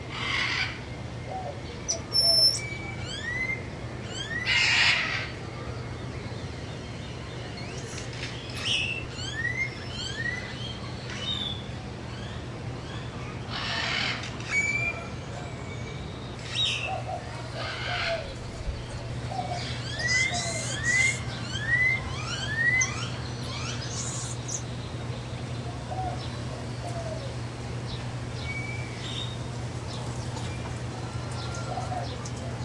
saz tamarin01
Golden-headed Lion Tamarins calling, with a macaw at the beginning, and grackles and a White-winged Dove throughout.
jungle, tamarin, parrot, zoo, macaw, dove, rainforest, monkey, tropical, amazon, grackle